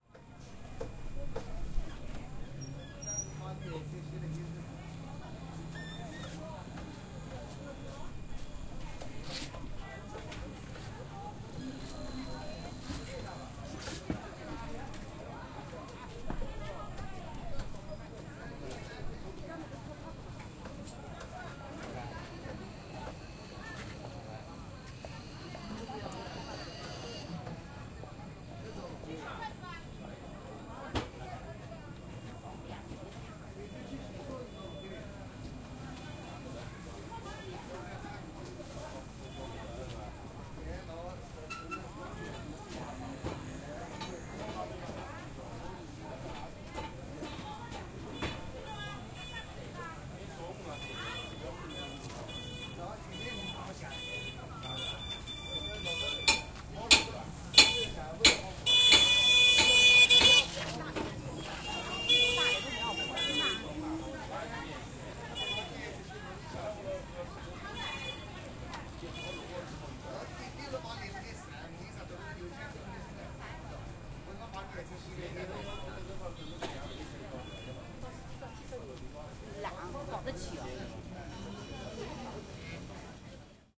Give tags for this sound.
stores; shopping; suburb; market; field-recording; traffic; China; motorbikes; Nanhui; Asian; ambience; voices; Shanghai; Chinese

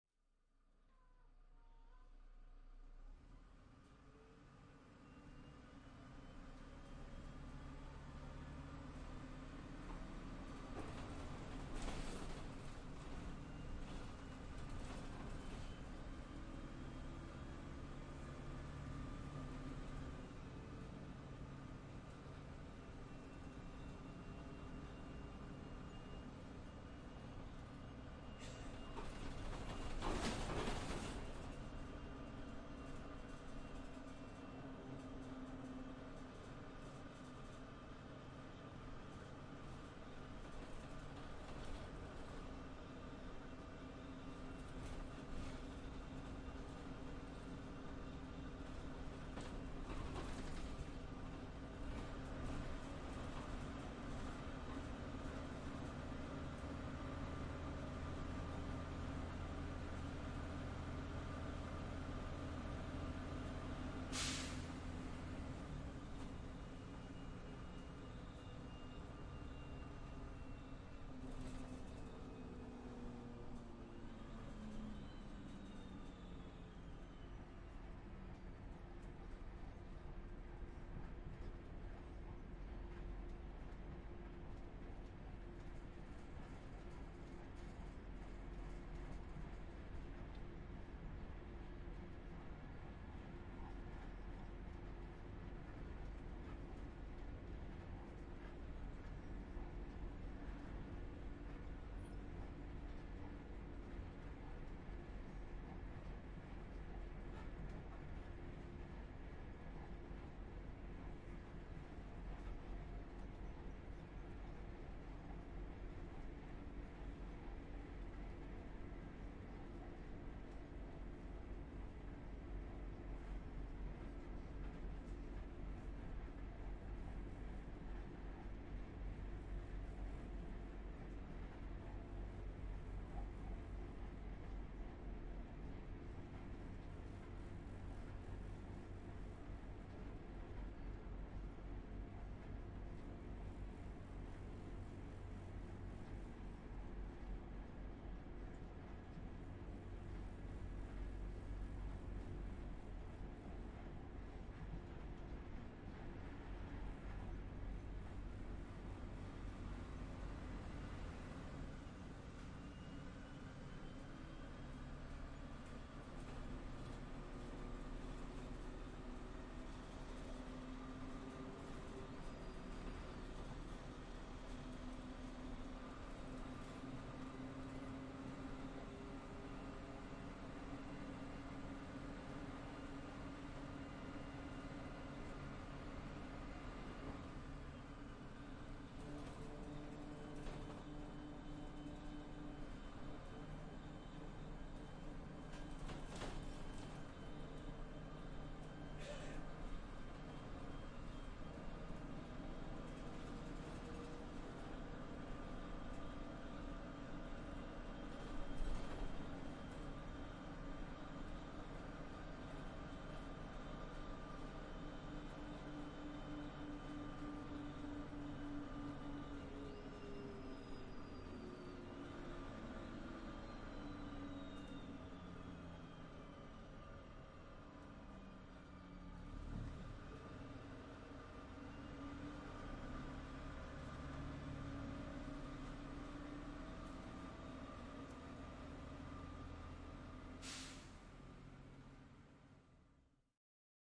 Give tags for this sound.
OWI; screeching; breaks; whirling; bus

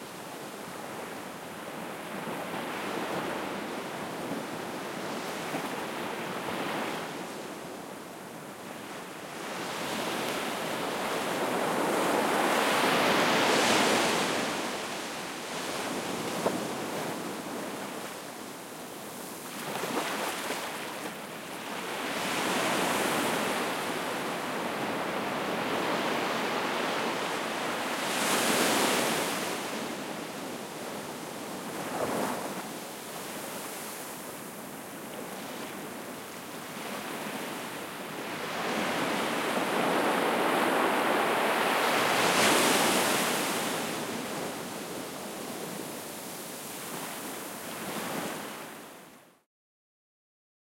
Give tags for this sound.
sea; sheashore; wave; wind

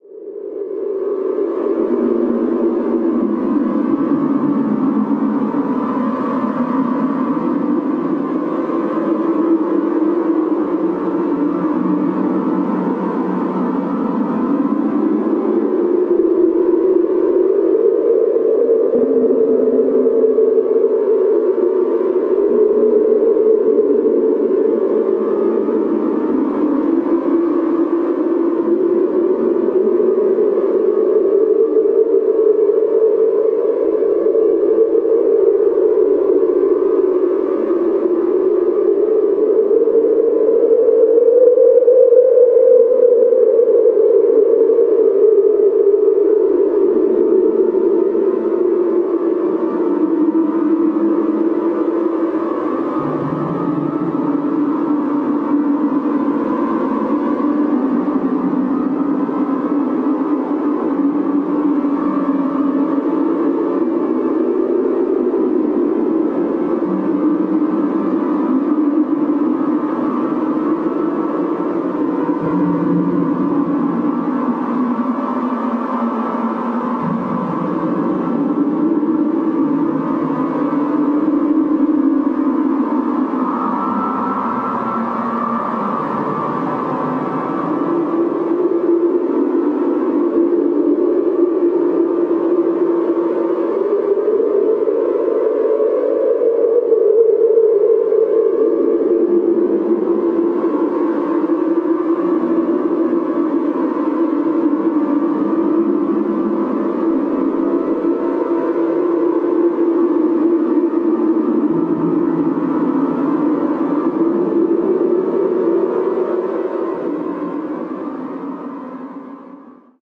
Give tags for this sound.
ambient; drone; experimental; reaktor; soundscape; space

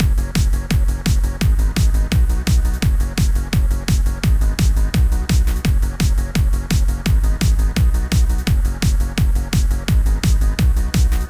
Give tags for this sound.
Dance; EDM; Hard; Loop; UK-Hardcore

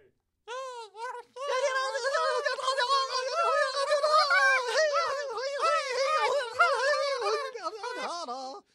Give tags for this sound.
cartoony; celebration; effects; foley; gamesound; high; little; man; party; people; sfx; short; sound-design; sounddesign; strange; vocal

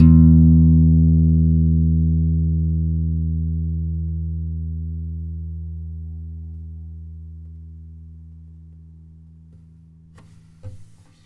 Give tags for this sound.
home; nylon-guitar; superlux

